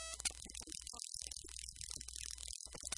vtech circuit bend041
Produce by overdriving, short circuiting, bending and just messing up a v-tech speak and spell typed unit. Very fun easy to mangle with some really interesting results.
broken-toy, circuit-bending, digital, micro, music, noise, speak-and-spell